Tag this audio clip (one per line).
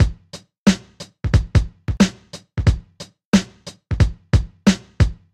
cut
hop
hip